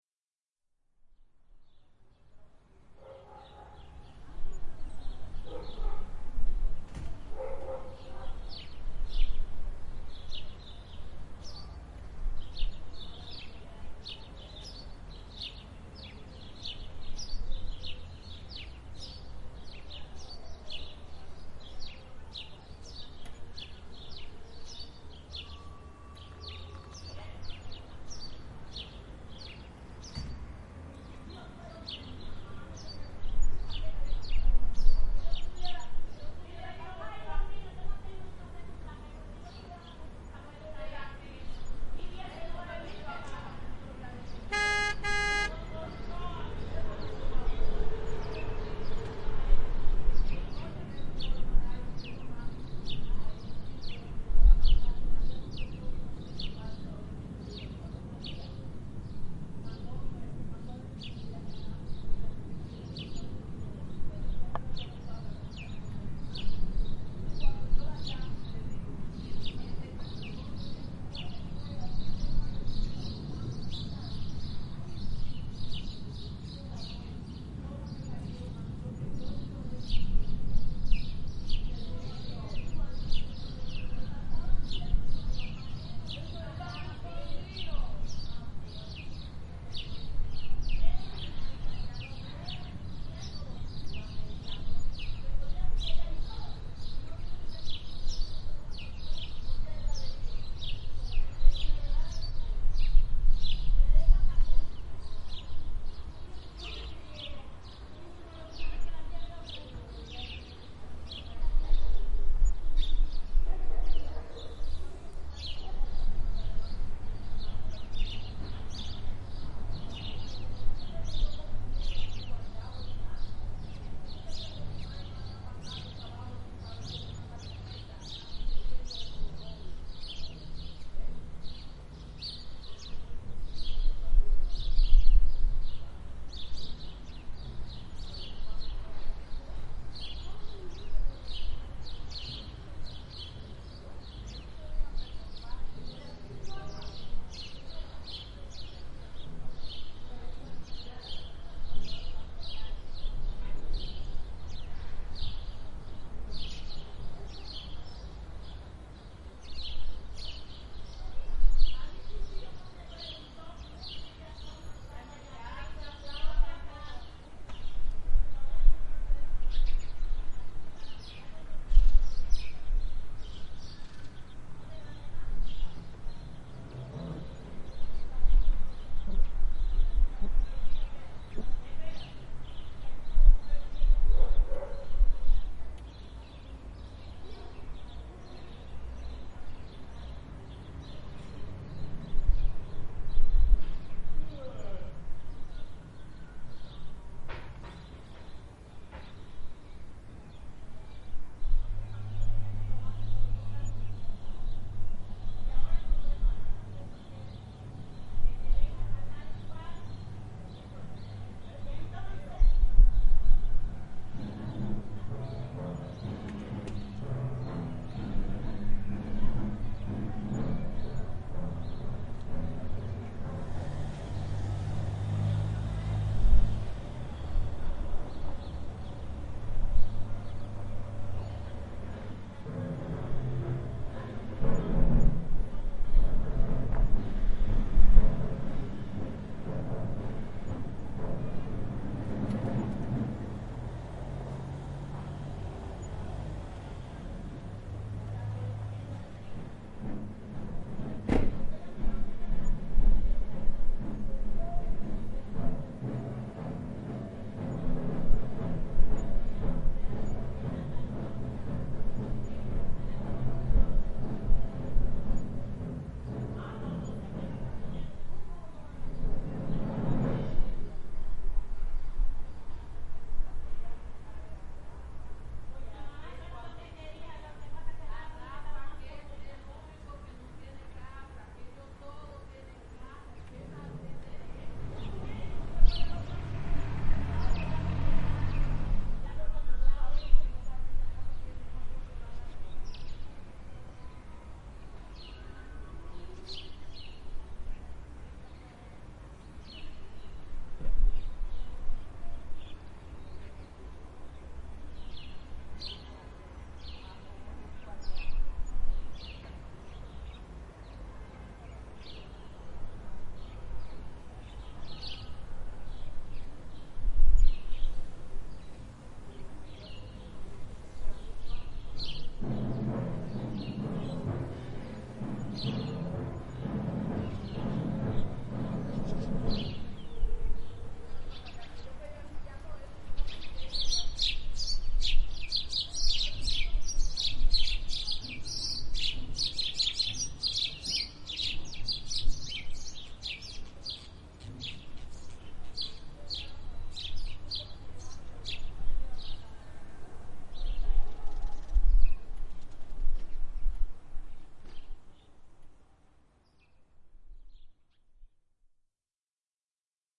Grabación realizada en la tarde del 14 de marzo de 2014 en el parque adyacente a la Asociación de Vecinos de Campuzano.
Recorded at the park near the Neighborhood Association of Campuzano (Spain) on the afternoon of March 14, 2014.
Cantabria, street, Campuzano, soundscape, field-recording, noise, Torrelavega